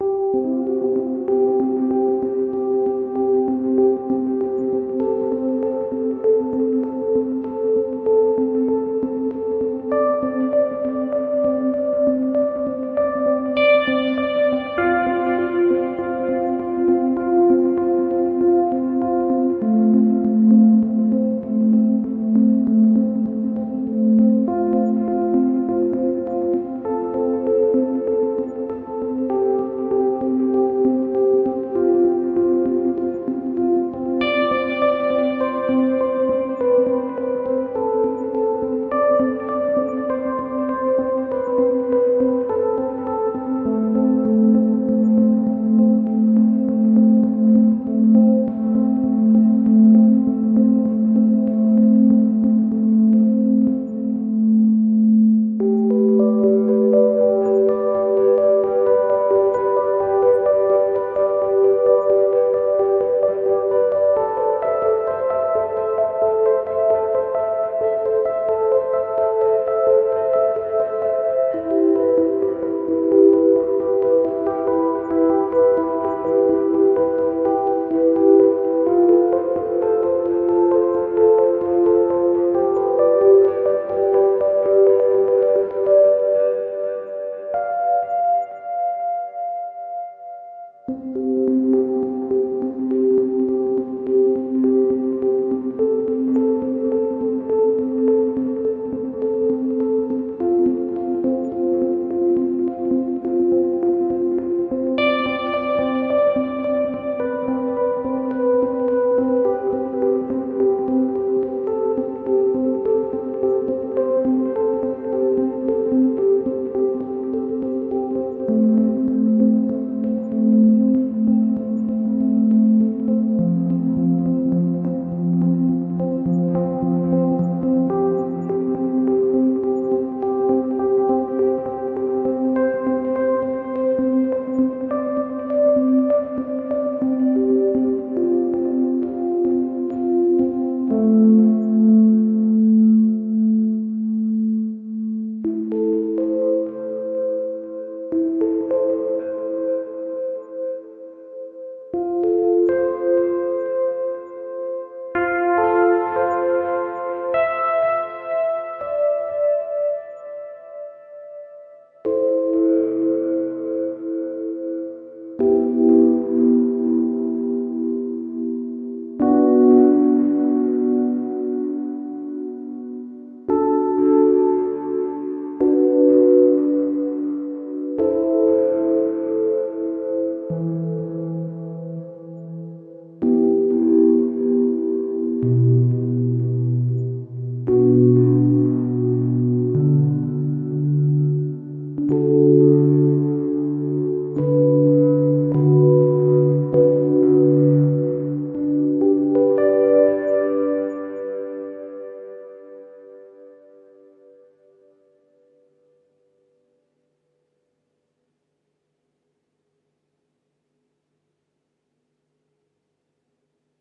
Simple Piano Improvisation waw.
This is very simple piano improvisation, with using delay and reverberation. Free tempo.
ambient, atmosphere, chords, echo, improvisation, instrumental, keyboard, keys, melodical, music, piano